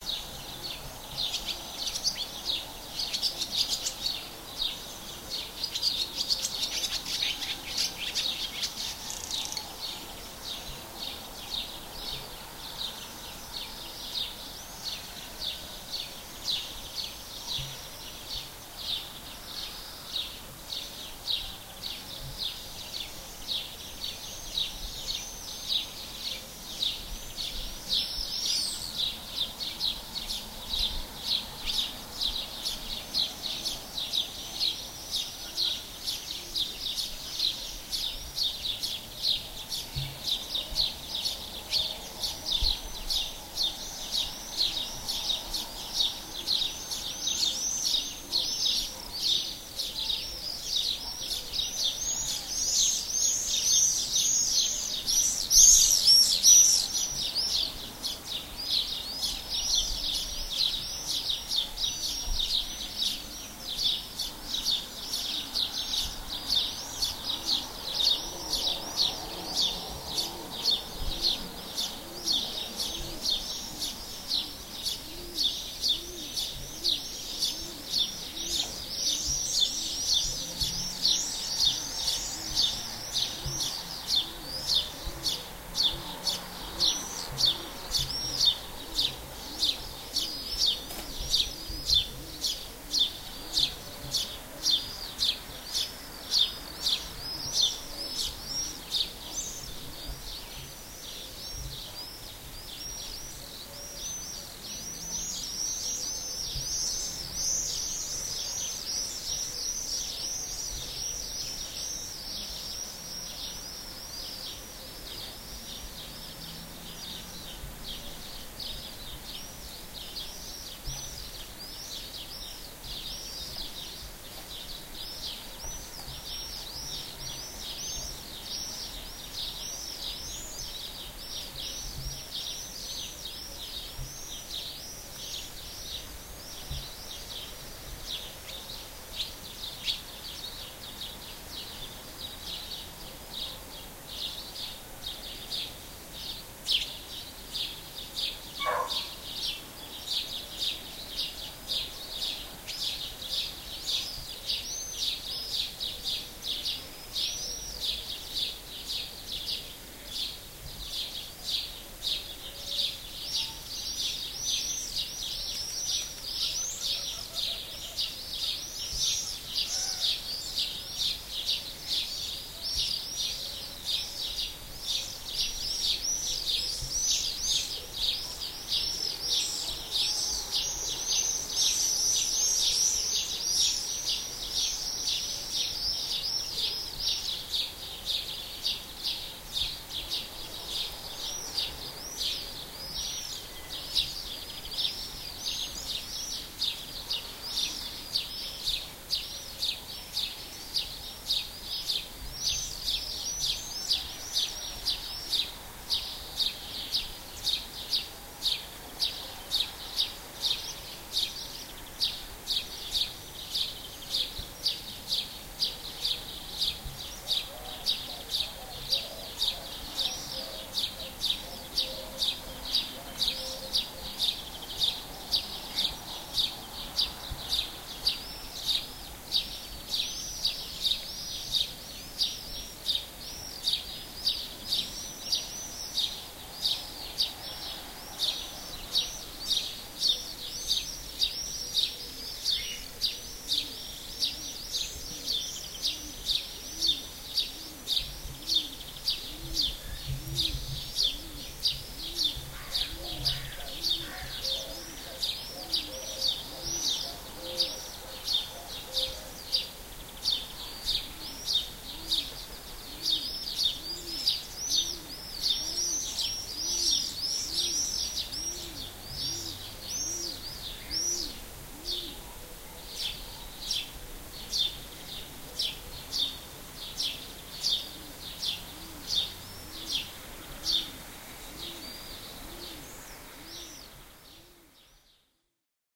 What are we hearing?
The dawn chorus as I recorded it on the 1st of May 2009 outside my parent's house. This is one of my few stereo recordings. I used to have a minidisc recorder with a cheep condenser microphone back then. I bought these cheep microphones from the UK. It was something like 20 pounds each. The sound quality was very decent for its price. I should buy a few to bring with me in Cyprus when I returned back. A typical dawn chorus for a Cypriot village near the capital with house sparrows, collared doves, swifts, gold finches etc.